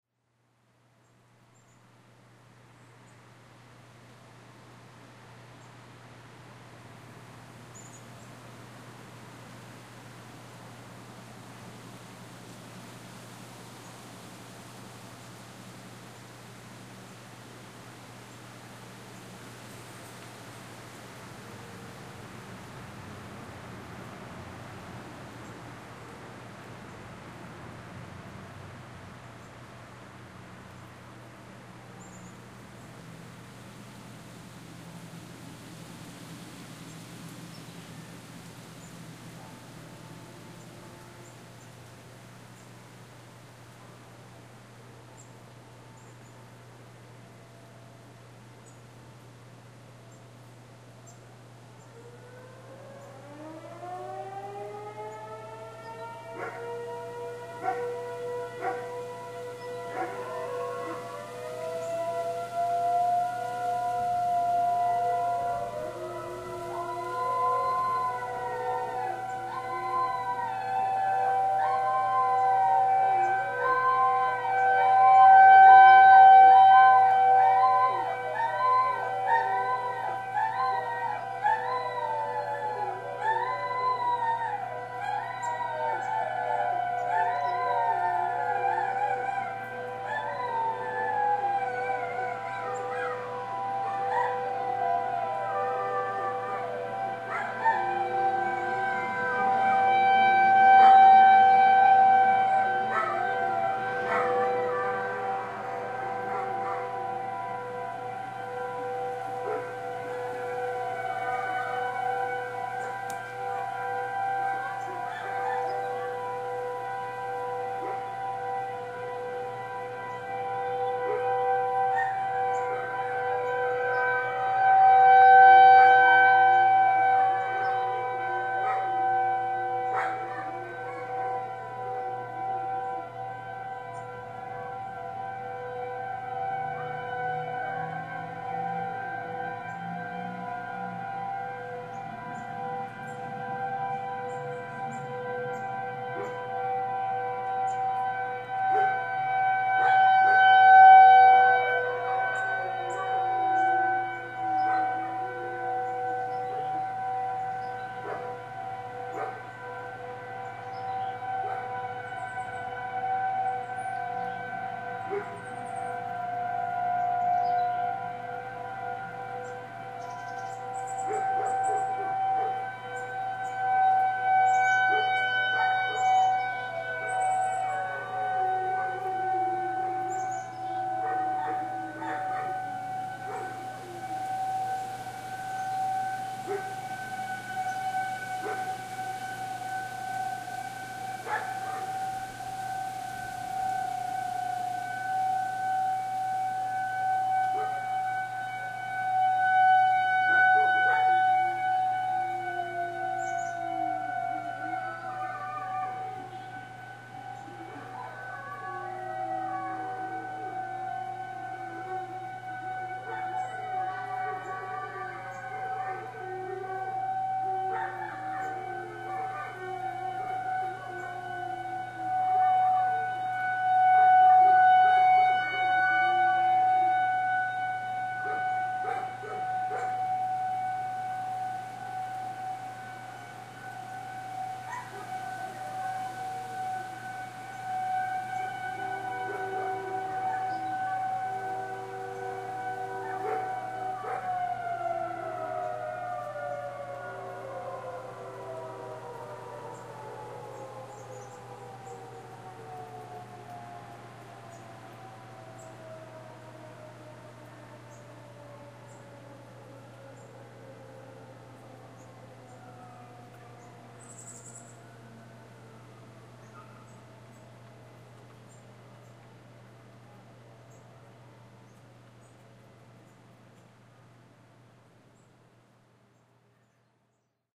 Civil Defense Tornado Sirens and Dogs Howl
Birds are chirping and a light breeze blows. Church bells can be faintly heard in the background. Then, starting with a low moan and rising to a high-wail, the civil defense (or tornado warning or air-raid or tsunami warning) sirens sound as the horn rotates and creates a doppler effect. The dogs in the neighborhood begin to howl and bark along. Sirens continue for several minutes until everything returns to normal.
air-raid, bark, civil-defense, defense, disaster, dog, emergency, howl, raid, signal, tornado, tsunami